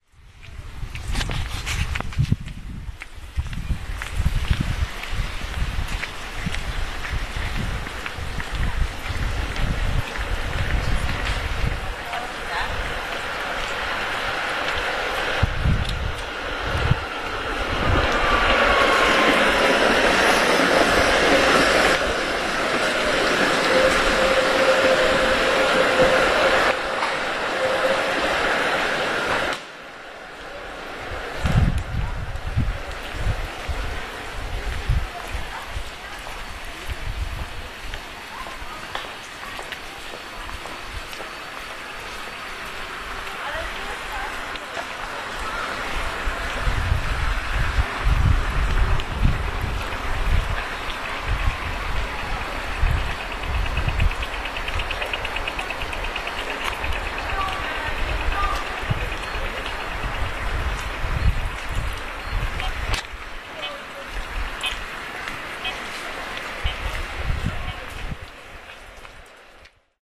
13/08/09 Poznan/Poland about noon. I am passing by Zielona street: you can hear people, tramway, light signals.